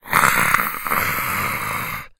A voice sound effect useful for smaller, mostly evil, creatures in all kind of games.
arcade, creature, fantasy, game, gamedev, gamedeveloping, games, gaming, goblin, imp, indiedev, indiegamedev, kobold, minion, RPG, sfx, small-creature, Speak, Talk, videogame, videogames, vocal, voice, Voices